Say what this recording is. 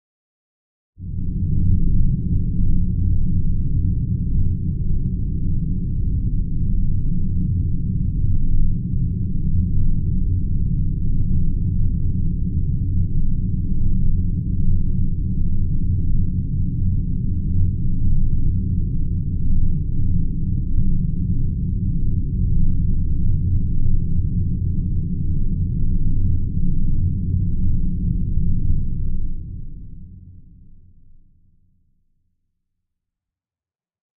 space rumble for satellite in orbit (among others)
soundscape, space, pad, drone, ambient